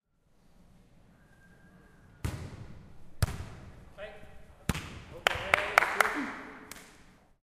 Recorded inside beachvolley location with 2 teams training